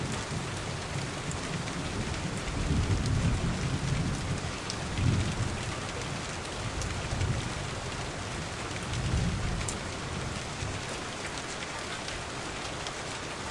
Another thunder, but further than the first one recorded with a Blue Yeti
Rain, Scary, Thunder, heh, Weather, Lightning, Thunderstorm, ambience